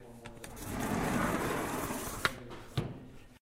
industrial curtainbracket slide-click3
close mic'd curtain slide, outside an indoor welding bay.
bracket, click, curtain, industrial, slide, welding